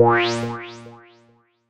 wah synth sound mad with Alsa Modular Synth
wah, synth